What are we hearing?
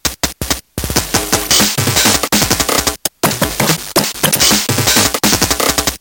Orion Beat 3
LSDJ At its best (well... ) I just bought the thing. Lay off these rythms Kids....
table, today, my, me, kitchen, lsdj, c64, glitch, chiptunes, drums, sounds, melody, big, little, nanoloop